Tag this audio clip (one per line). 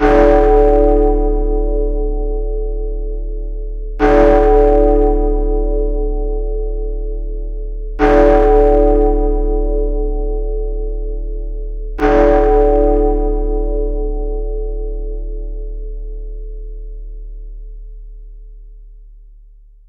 four-bell-strikes
four-oclock
large-bell
tollbell
4-bell-strikes
big-ben